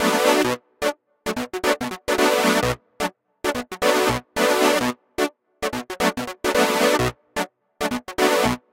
B Minor Solo Synth Funk Loop 110bpm
Funky solo saw synth loop to beef up your groovy track! I've made one in every minor key, all at 110bpm for maximum percussive funk!
funk, loop, synth